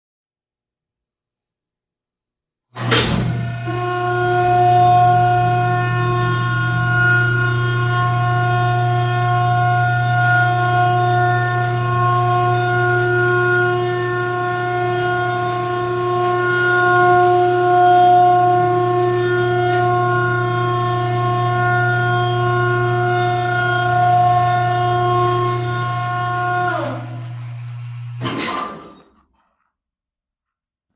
Elevator(Clean)
This sound have been recorded in Mono, on a Samsung S8, using the recording app ''AudioRec''. I have used Izotope RX 6 to remove any unwanted noise.
This is the sound of an industrial elevator. The ones that you have to press and hold until you have reach your desired floor.
Elevator, Loud